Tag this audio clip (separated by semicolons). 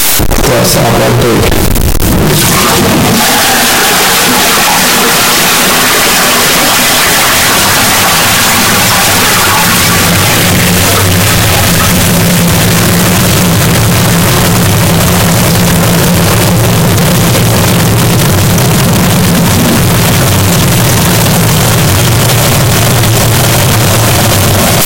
flushing-toilet; water